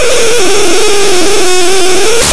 bear down
short clips of static, tones, and blips cropped down from raw binary data read as an audio stream. there's a little sequence marked as 'fanfare' that tends to pop up fairly often.
data, digital, electronic, glitch, harsh, lo-fi, noise, raw